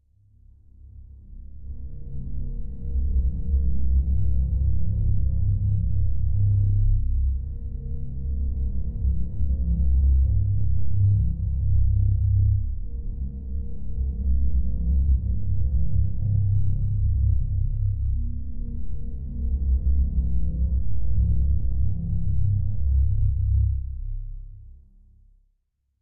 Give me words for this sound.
Fx_Soundscapes from manipulating samples(recording with my Zoom H2)
bass, fx